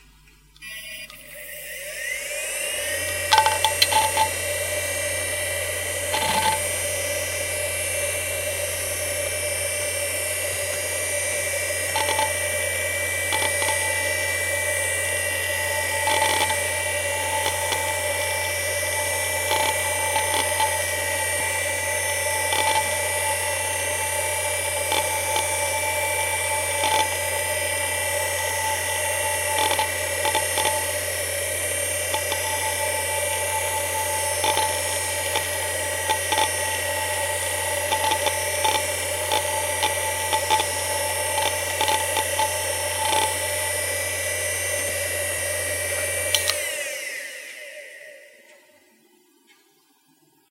Seagate U5 - 5400rpm - Slow Spinup - BB
A Seagate hard drive manufactured in 2000 close up; spin up, writing, spin down.
(ST340823A)
disk, machine, drive, hard, hdd, seagate, rattle, motor